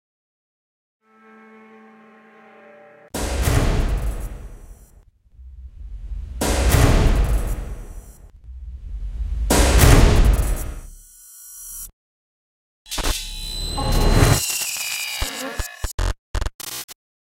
Impact Metal Texture
Metal and oxide impact.
Big crush of metal.
big, Impact, crushes, oxide, Metal, Smash, scrap